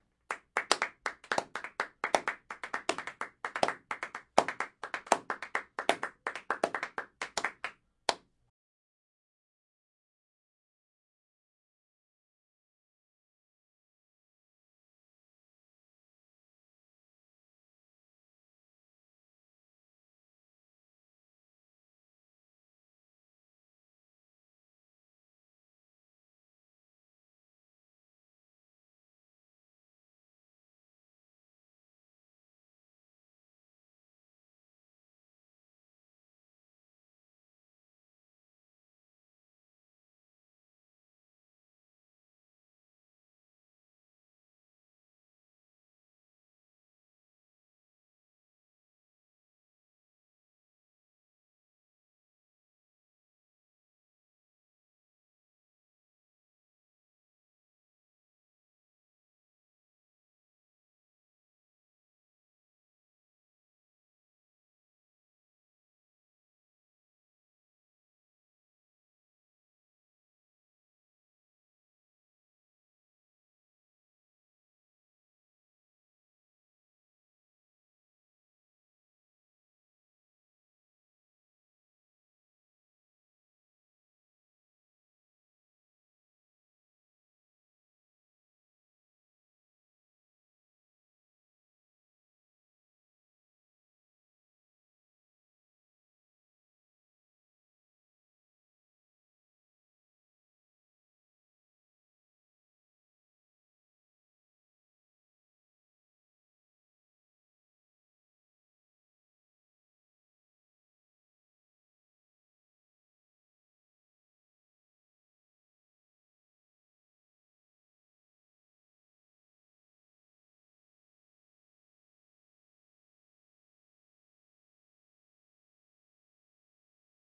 Aplausos (SA)
Un grupo de personas aplaudiento con diferentes velocidades en una habitación.
Aplausos, Audio-Technique, Clapping, Claps, Diseo, Dmi, Estudio, Interactivos, Medios